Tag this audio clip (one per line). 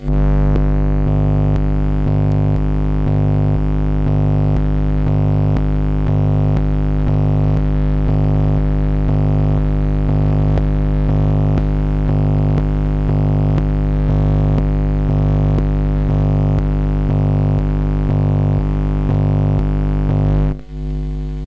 electromagnetc
synthesizer
valve